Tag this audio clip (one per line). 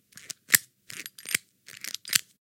rubber
saw
squeak
cutting